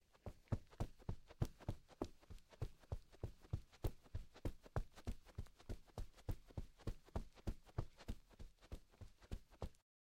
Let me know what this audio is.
Running footsteps on a carpet, recorded in an acoustically treated sound booth. Recorded with a Zoom H6 and an XY capsule.